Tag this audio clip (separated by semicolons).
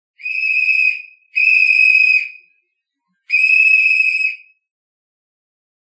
final; silvido; sonido